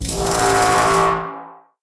FX time freeze
A magical time freeze.